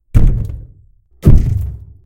And another thud
thud, punch, fight, fall